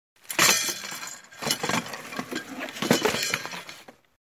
bouchnutí se židlí 2
bouchnutí se židlí
wood, slam, chair, wooden